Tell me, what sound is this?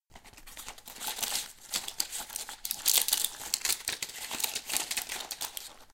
Taking the medicine sachets out of a box. Recorded with Zoom's H6 stereo mics in a kitchen. I only amplified the sound.
sachets, field-recording, medicine, plastic, foley, box, paper, pills